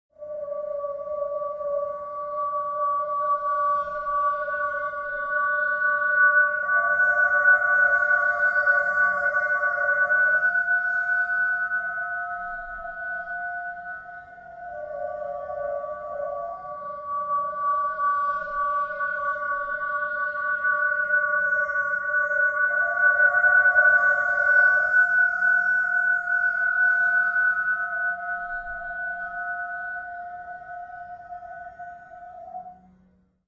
Ambient processed breath drone. Gradual spectral/harmonic morphing.